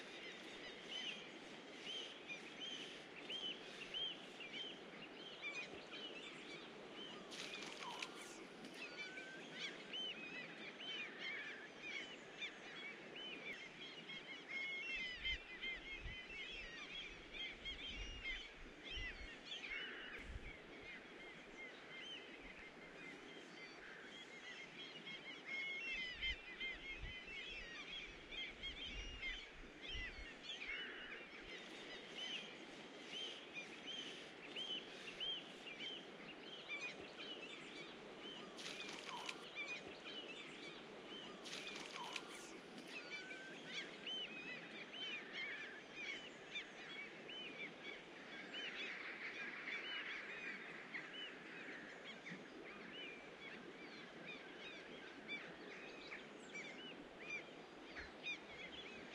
Riverside Birds
I recorded this on the River Spey in Scotland in June. You can hear an assortment of birds calling and squawking
Chirping, Birdsong, birds-calling, Atmosphere, riverbank-sounds, Park, Nature, birds-chirping, Environment, Noises, Field-Recording, Chattering, riverbank, birds-squawking, Forest, Birds, squawking, Bird, Peaceful